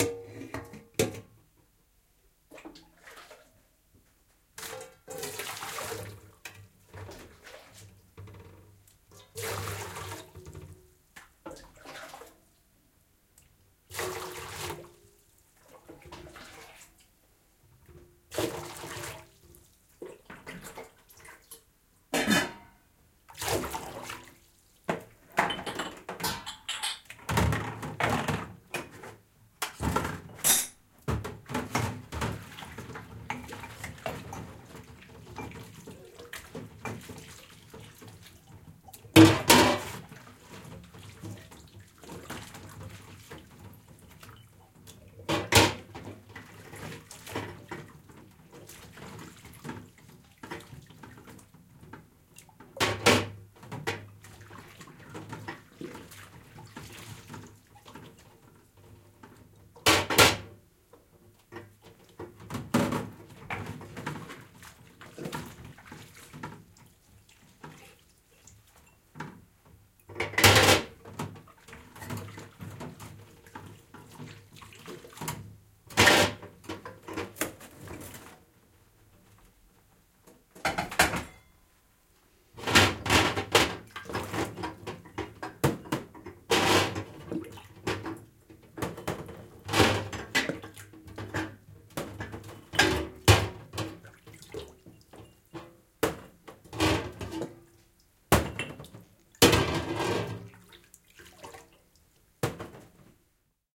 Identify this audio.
Vettä kauhotaan peltiseen pesuvatiin. Astioita tiskataan, pestään, ja siirretään toiseen vatiin tai pöydälle.
Paikka/Place: Suomi / Finland / Rautjärvi, Kiilinniemi
Aika/Date: 26.10.1989